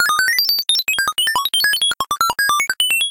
SciFi, Beeping Technology 02
S/O to parabolix for being an active moderator!
SciFi, Beeping Technology
This sound can for example be used for robots - you name it!
android, beep, beeping, computer, data, droid, electronics, high-tech, information, robot, robotic, robotics, sci-fi, tech, technology